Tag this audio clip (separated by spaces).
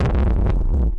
massive analog deep perc analouge artificial harsh bomb hard blast sherman percussion shot filterbank